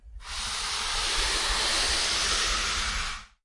A stereo recording of a whiteboard duster across a plastic table. Moves from right to left.
I used it to simulate a sliding door.